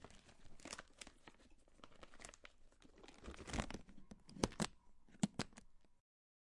The sound is created by a wooden box case with metal clips that connect to one another just like with an ordinary briefcase.